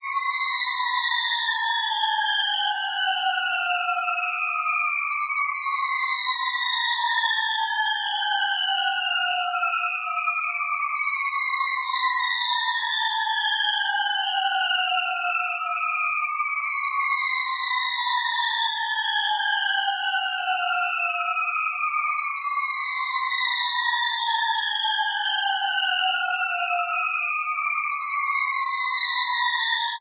Created with coagula from original and manipulated bmp files.